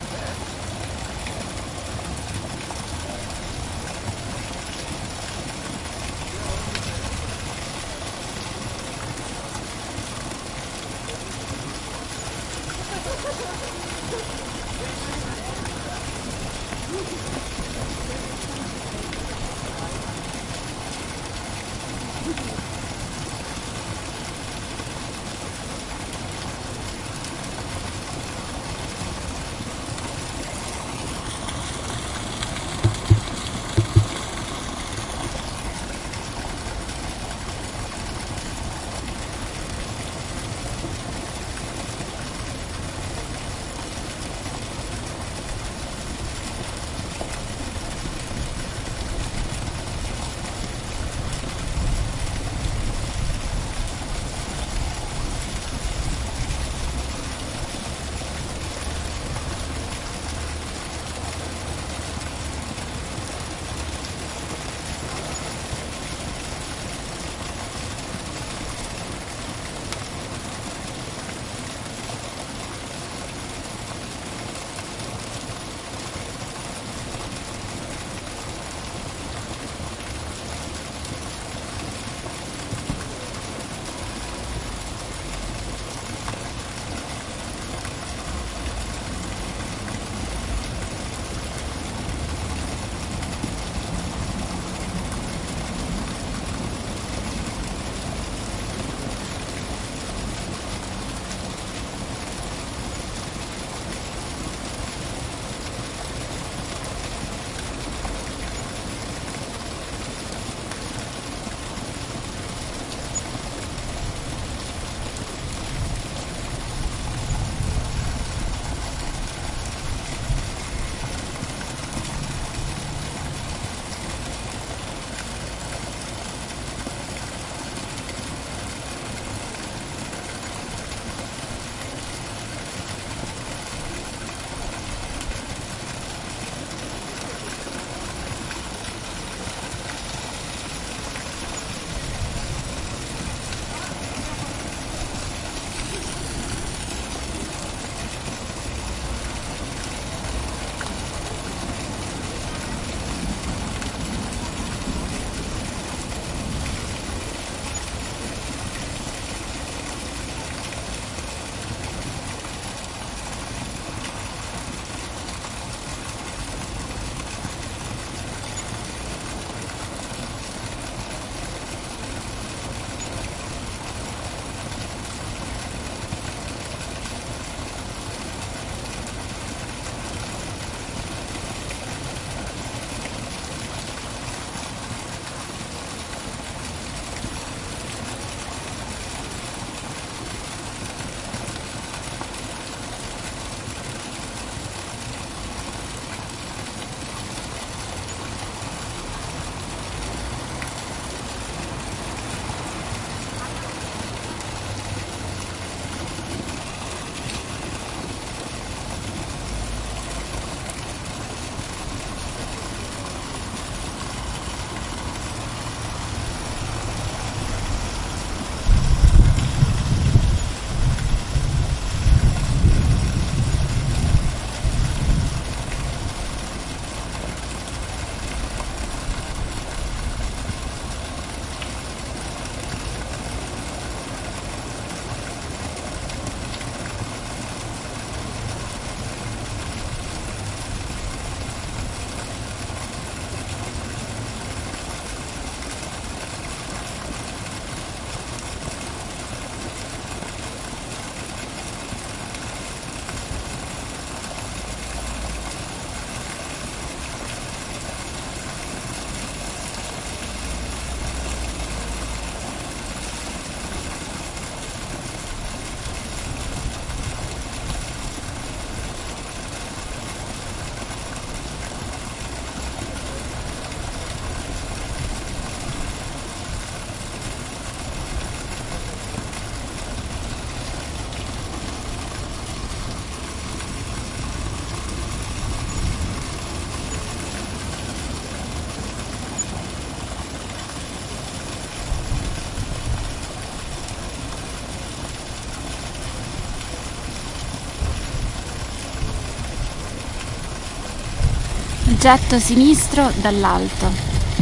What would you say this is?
Sablon Fountain
Sound of the beautiful fountain in Place du Petit Sablon, Brussels.
Recorded with ZOOM H2n